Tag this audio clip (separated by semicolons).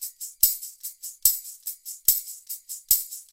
percussion; percussion-loop; tamborine